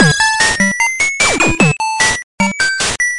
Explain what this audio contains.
HardPCM ChipRemix #-14-194701720
Breakbeats HardPCM videogames' sounds
chiptune, cpu, hi, pcm, stuff, videogame, wellhellyeahman